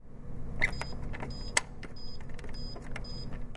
Coffe Vending Buttons
Electric sound of the buttons of a coffe vending machine in 'Tallers' area.
coffe-machine, coffe-vending, electric-sound, campus-upf